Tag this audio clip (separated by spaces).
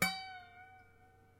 bend harp